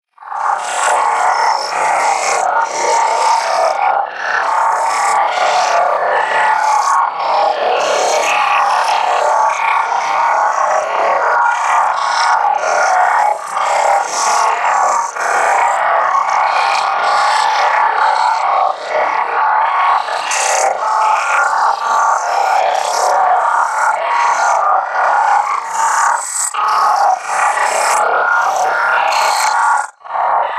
alien vocal matrix
This sound has been created by modifying a voice record of about 3 minutes non-sense talk. Afterwards transformed and modified by many various effects in Audacity.
Sounds like an alien transmission or digital matrix, transformation kinda thingy..
noise, sci-fi, electronic, transmission, alien, digital, distortion, matrix, computer, electric